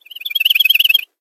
This is the 'bird chirp' which I used for text message notification on my old Palm Treo 680 'phone. When I originally got the Treo it was stored on there as a tiny MIDI file, but it was too short. The 'chirp' proved really popular although until now I had no way to give it to others because their 'phones had different MIDI ringtone specifications. Now, though, I got a new 'phone, so it was time to record it as audio.
The funny thing about this sound is that at the studio we developed the habit of looking around at the roof whenever a text message came in, leading many people to believe that there was actually a bird in the control room somewhere.
Recorded at Pulsworks Audio Arts by Lloyd Jackson. Josephson C42 mic, NPNG preamp. Recorded into Pro Tools and edited / processed in Cool Edit Pro.